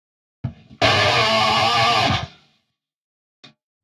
Dist skrik
A scream with distortion